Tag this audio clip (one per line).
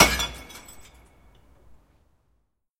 bin
bottle
break
crack
crunch
drop
glass
recycling
shards
shatter
smash